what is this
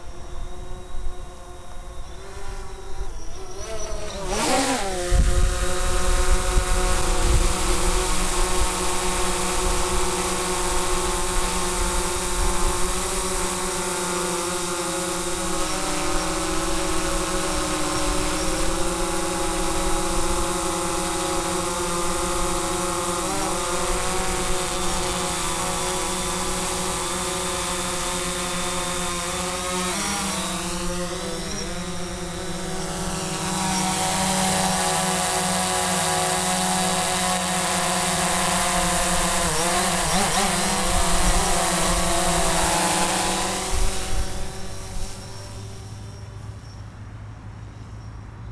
Field recording of a Phantom 4 Pro drone hovering. Recorded with a Tascam DR-40